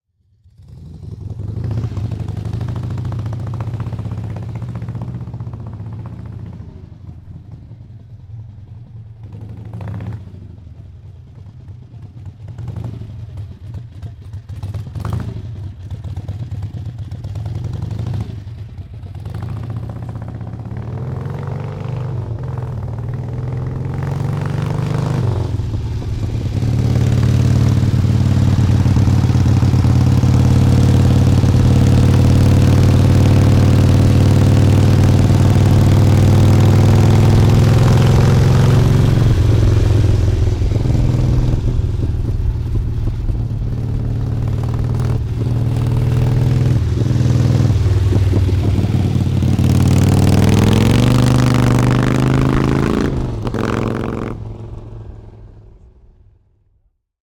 Harley Davidson XLCH 1974 7
Harley Davidson XLCH 1974, 1000 cc, during riding recorded with Røde NTG3 and Zoom H4n. Recording: August 2019, Belgium, Europe.
1974, Belgium, Motorcycle, XLCH, Harley-Davidson, Motorbike